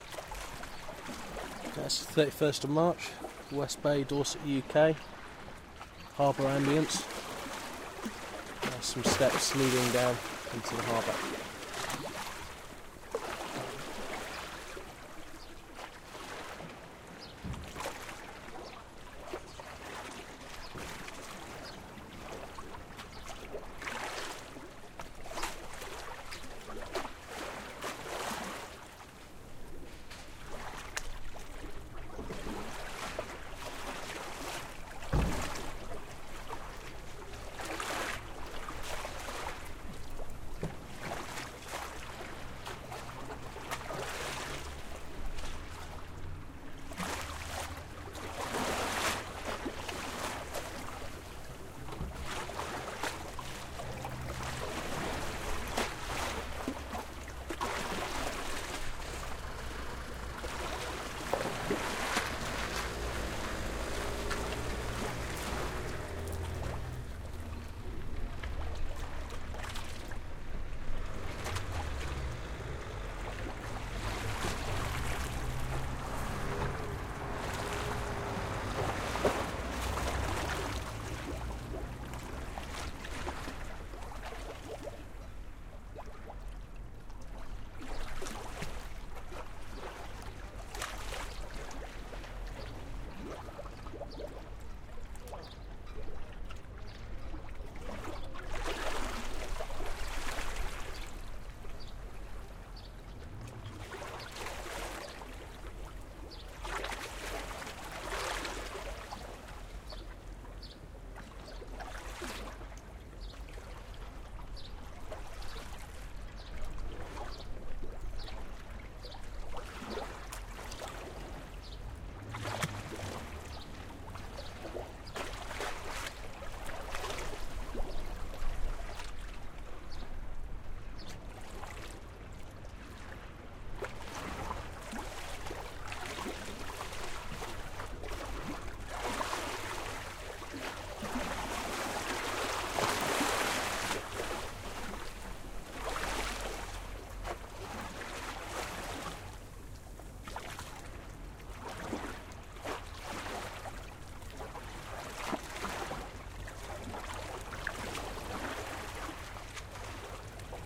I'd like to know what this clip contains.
Small Harbour Ambience